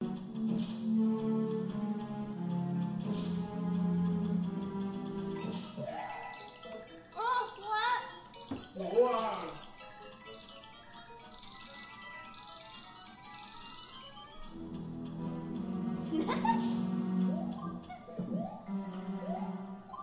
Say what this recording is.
G11 living room
a television playing into the living room
living, television